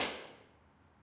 I stomped my feet in a concrete stairwell.